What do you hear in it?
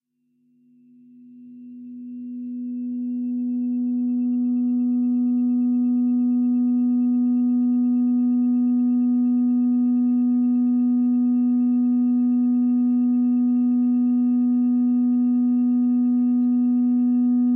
Dist Feedback B-2nd str
Feedback from the open B (2nd) string.
distorted, distorted-guitar, distortion, extras, guitar, miscellaneous